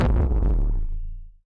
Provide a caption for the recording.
sherman shot bomb02
I did some experimental jam with a Sherman Filterbank 2. I had a constant (sine wave i think) signal going into 'signal in' an a percussive sound into 'FM'. Than cutting, cuttin, cuttin...
shot, sherman, hard, deep, artificial, analouge, massive, bomb, perc, percussion, blast, harsh, analog, filterbank